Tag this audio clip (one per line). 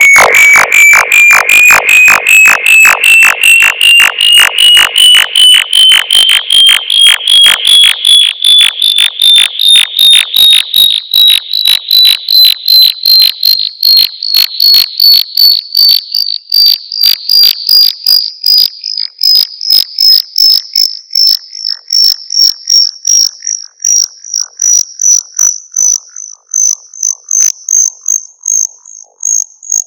abstract
freaky
glitch
hallucination
noise
scary
spooky
wave
weird